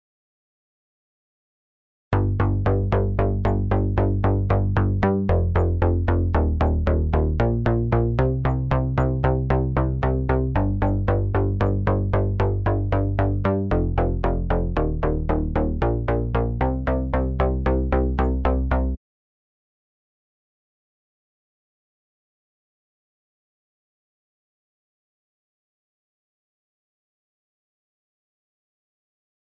Lil 80s Vibe Plucky Bass [114bpm] [G Minor]
80s, arp, bass, free, loop, pluck, plucky, synth, vaporwave, vibe